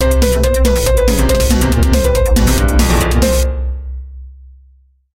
More than a feeling, a twisted feeling.
The all together sound
140bpm